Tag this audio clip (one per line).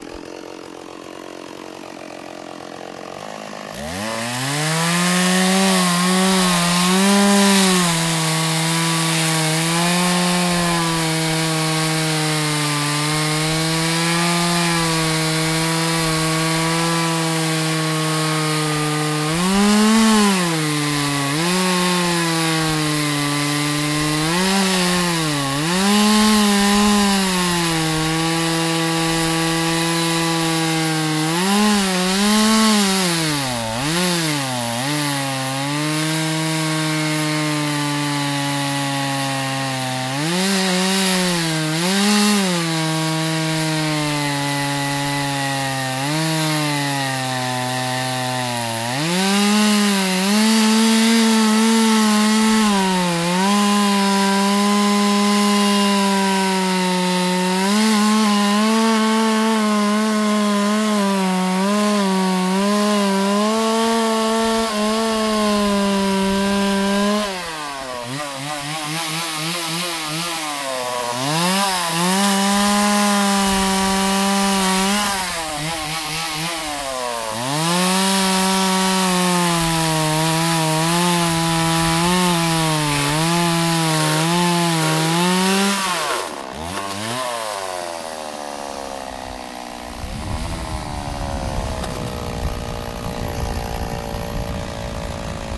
motor
cut
cutting
woodcutter
saw
chop
chainsaw
wood
slice
slicing
stihl
sawing
husqvarna
chopping
lumberjack
tree